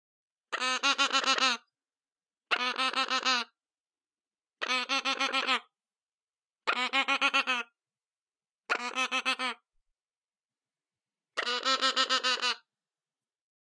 I´m taking care for a while a baby parrot, he is still too young to speak but he makes interesting noises